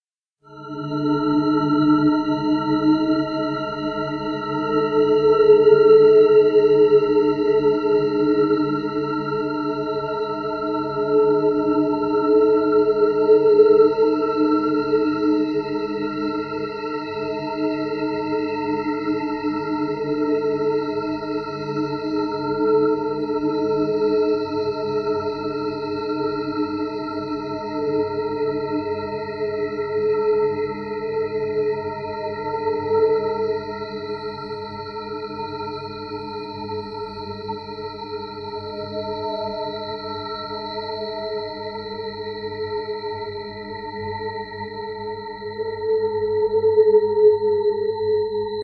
howling terror
a droning, somewhat howling sound